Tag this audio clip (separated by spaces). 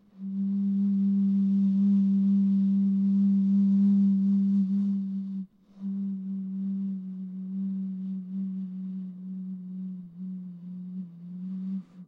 toot plastic pop bottle blow whistle soda